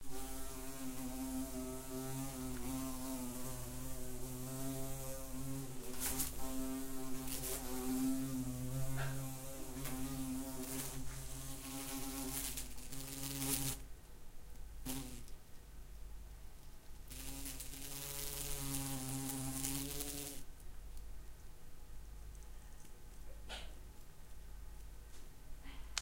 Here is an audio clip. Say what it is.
a wasp entered in my studio
BEE, WASP